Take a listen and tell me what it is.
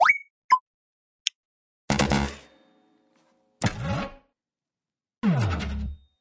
Simple Video game UI sounds for navigating menus or selections
8bit, computer, cute, game, sfx, ui, user-interface